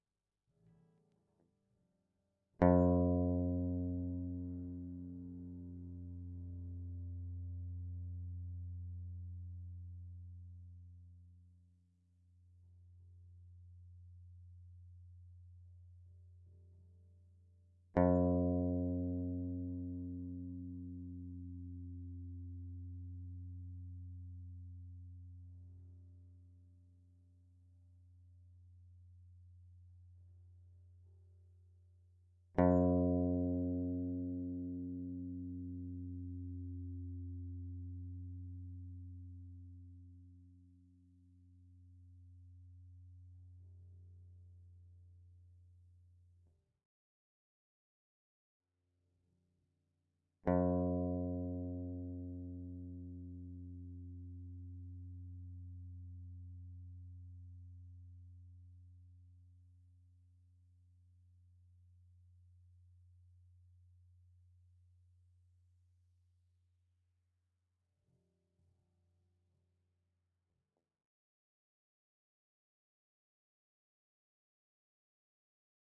Pacifica low E string -F
Yamaha Pacifica electric guitar no effects one note played 4 times
effects, clean, guitar, no, electric